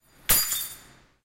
Dropping keys on the floor
This sound was recorded at the Campus of Poblenou of the Pompeu Fabra University, in the area of Tallers in the corridor A-B corner . It was recorded between 14:00-14:20 with a Zoom H2 recorder. The sound consist in a high frequency multiple impact as some metal keys fall to the floor. Its metal structure makes the impact tonal (differenciate pitch)
key, metal, UPF-CS12, keys, drop, fall, campus-upf